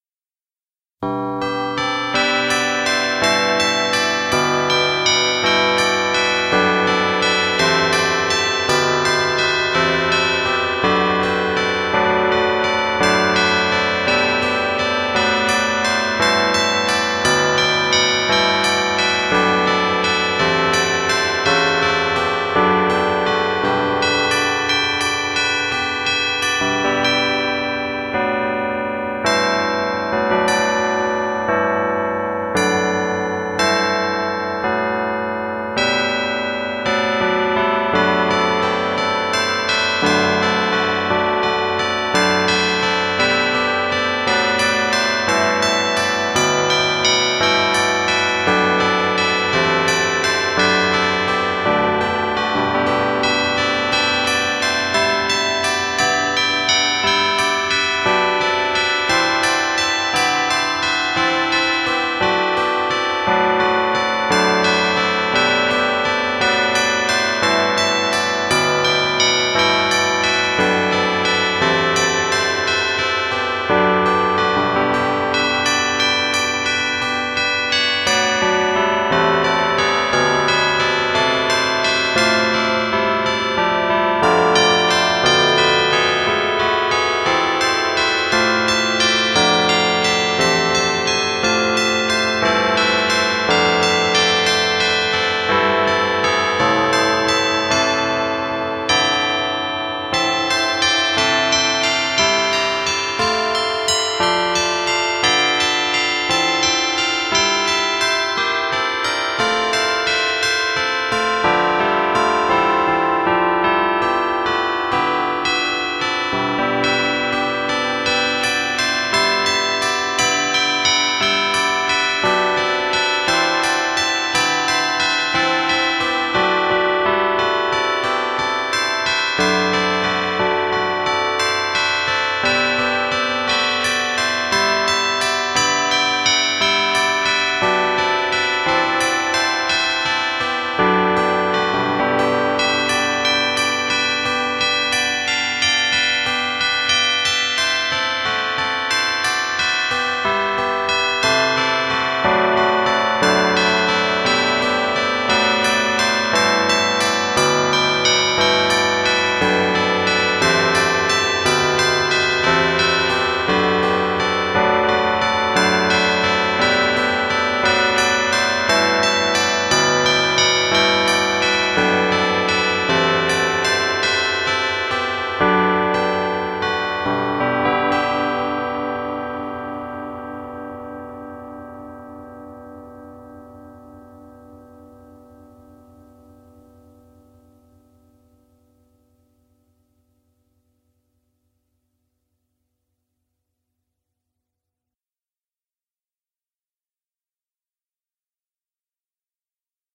Jesu, Joy Of Man's Desiring (Maas-Rowe Digital Carillon Player)
New bell sounds for you guys. Because I know how much you like them. This time, I have bell songs from the Maas-Rowe Digital Carillon Player, or DCP for short. The DCP uses a series of tuned chime rods that are hit with electronically actuated hammers to produce a series of wonderful sounding hymns and other bell songs. The rods also use electronic pickups, similar to an electric guitar, witch sends their sound to an external amplifier, witch then amplifies the sound and sends it up the bell tower to large Public Address horns where its sound gets heard by people many blocks away from the church. This song here is a beautiful example of what the Maas-Rowe DCP is capable of. I hope you enjoy this, and the other songs from this beautiful carillon.
Carillon-Chimes, Songs, Church-Bells